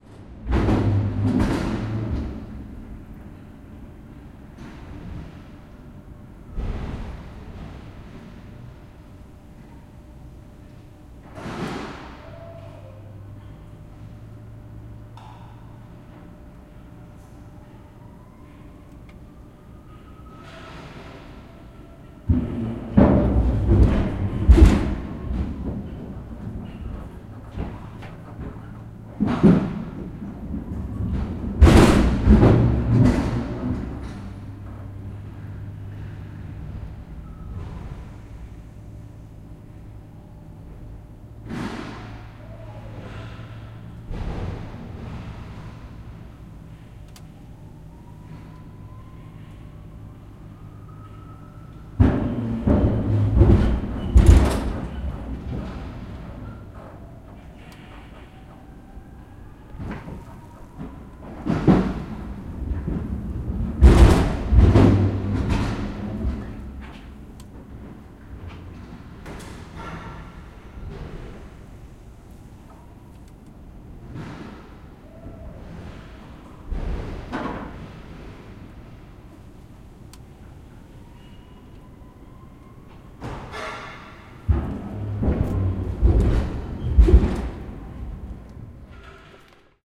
110801-loading of blackcurrant3
01.08.11: the second day of my research on truck drivers culture. Denmark, Oure, behind of the fruit-processing plant. Loading ramp - process of loading of 24 tones of blackcurrant. Noise made by forklift (a lot of banging, clicks, typical for forklifts hiss). Sound of birds in the background. Recording made in front of truck cab.